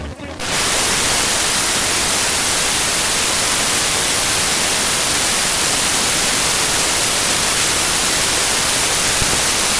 sample exwe 0181 cv fm 02 lm lstm epoch0.79 2.0074 tr
generated by char-rnn (original karpathy), random samples during all training phases for datasets drinksonus, exwe, arglaaa
char-rnn, generative, network, neural, recurrent